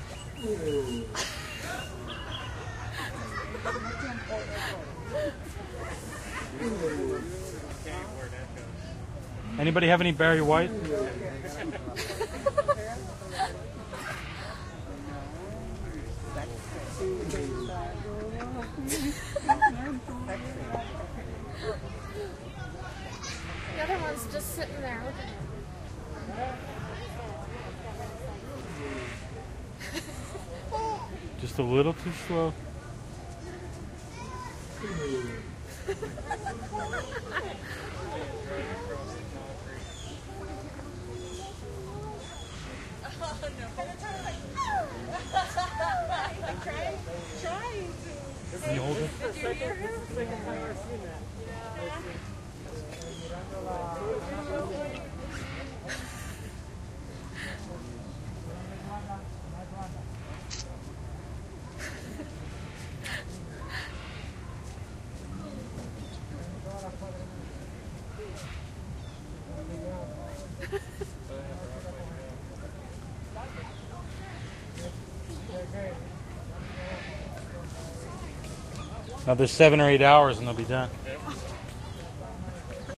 Walking through the Miami Metro Zoo with Olympus DS-40 and Sony ECMDS70P. Two giant turtles making sweet love.